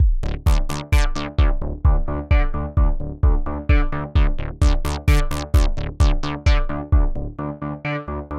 Synth Arpeggio 01
Arpeggio Loop.
Created using my own VSTi plug-ins